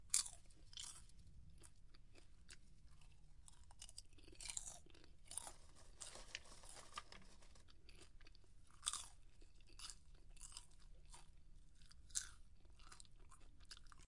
Eating popcorn
dm152, eating, snack, popcorn